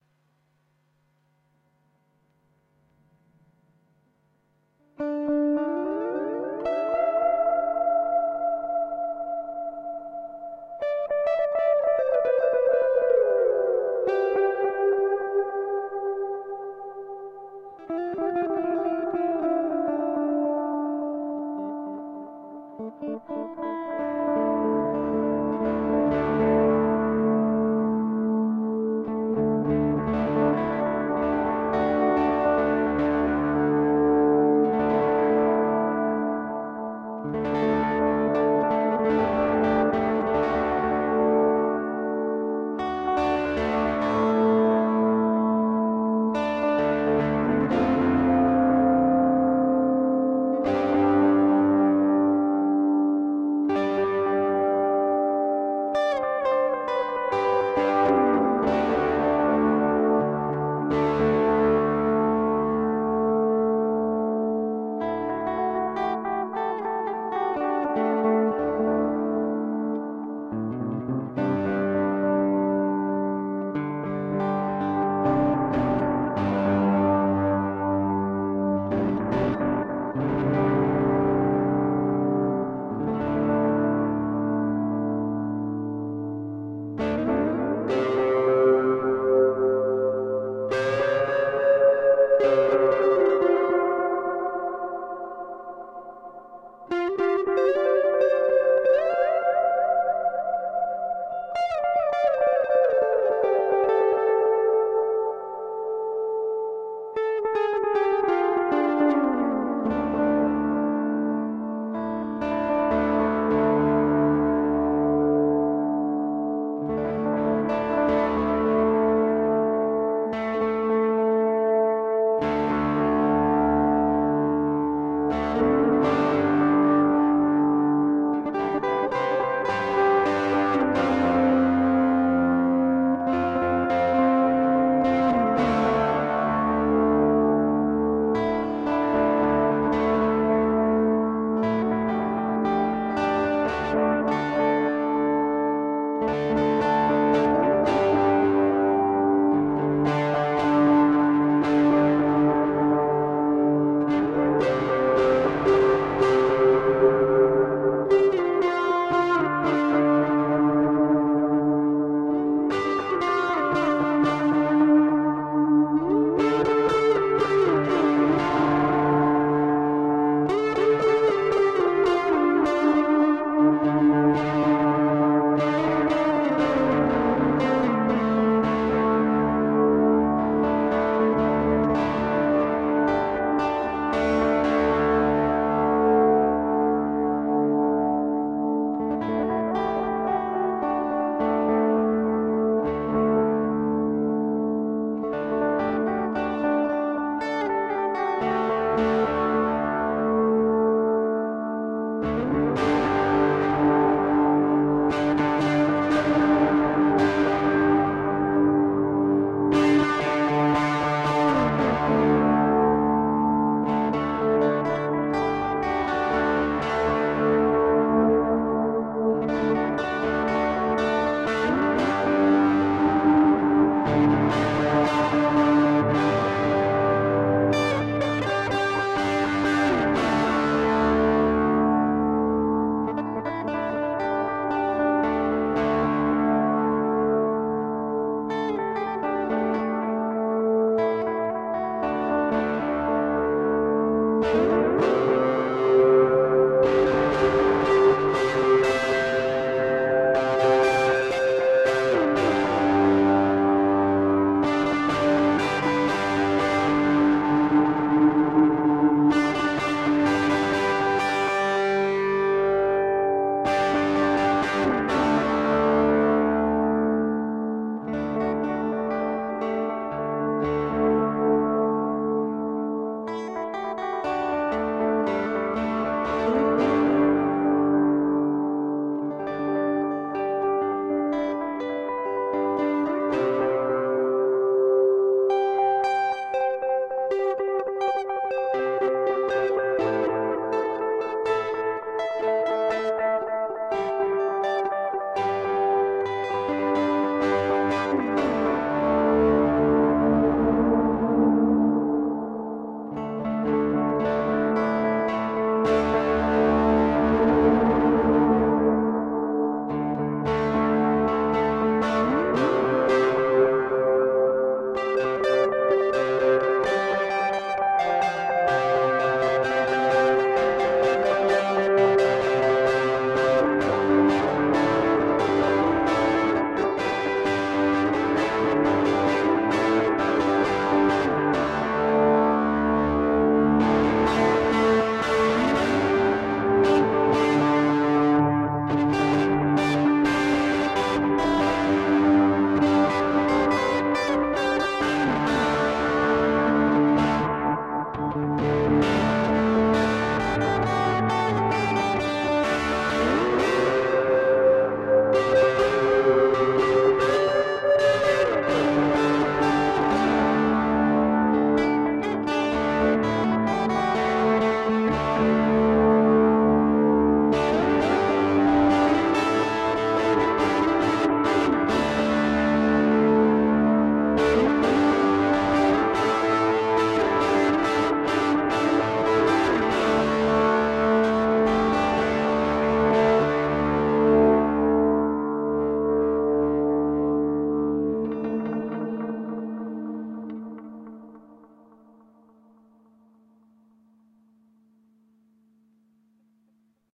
Slow and atmospheric electric guitar solo with delay and draw. It is long enough :).
psychedelic, improvised, instrumental, music, experimental, melodical, guitar, acoustic